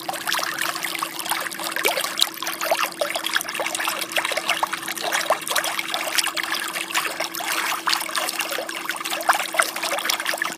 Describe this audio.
Sound of the water bubbling from the pool in my yard.
bubble, bubbles, bubbling, gurgle, liquid, pool, water